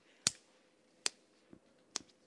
Punch in skin 2
Real life punching of skin